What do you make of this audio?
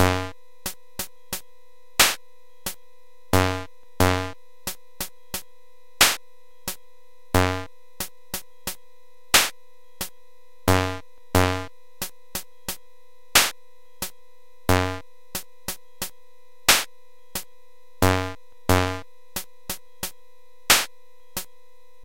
This came from the cheapest looking keyboard I've ever seen, yet it had really good features for sampling, plus a mike in that makes for some really, really, really cool distortion.
90, 90bpm, ballad, beat, cheap, drums, electronic, keyboard, loop, machine, slow, toy